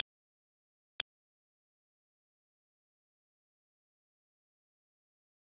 Simple 5.1 (with LFE backed in) surround test file created from a click sound.
The file uses the following configuration:
- Left
- Right
- Center
- LFE
- Left surround
- Right surround